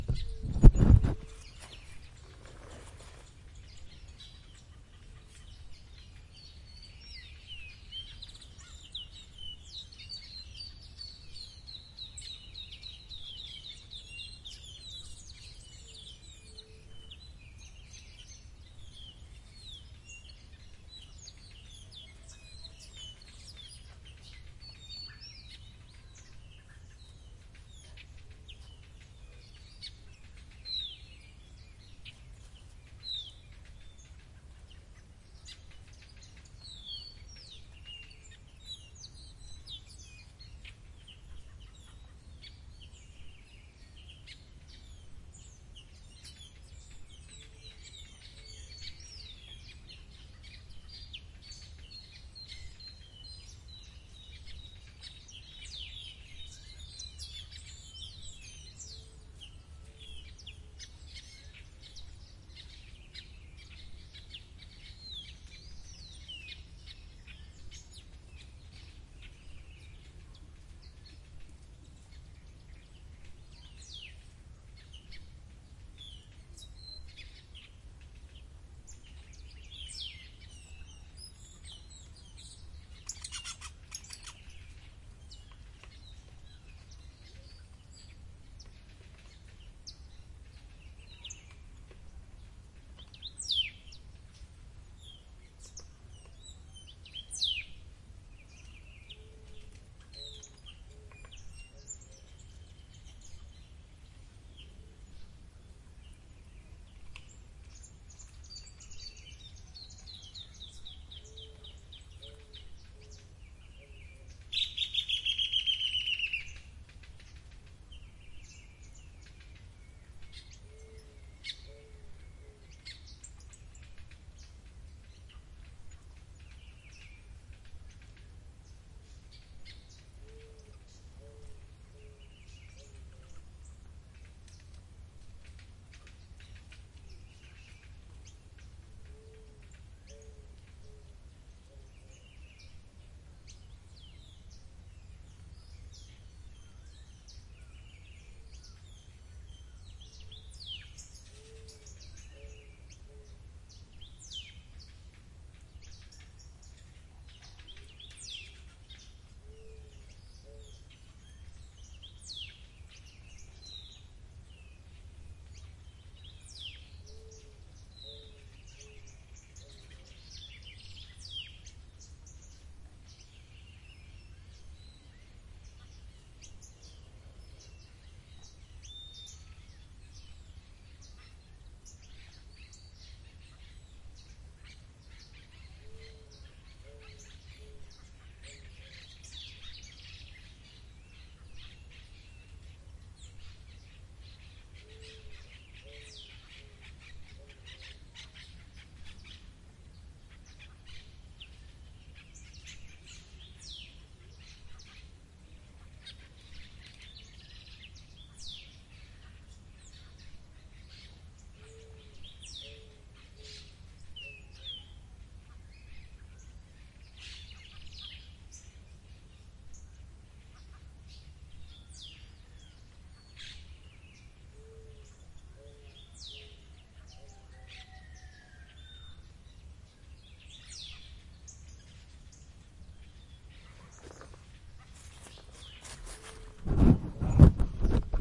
Birds Zárate, Argentiana
Field recording, was done with Zoom H6. You can hear beautiful nature far away from the city.
Birds, Peaceful, Field-recording, Environment, Forest, Nature